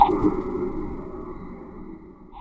Strates Perc Labo 2
Different sounds mixed together...
synth, wave, beep